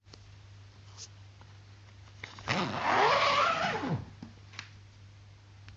zip close
closing a guitar sheath with zip.